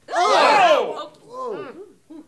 Small crowd being startled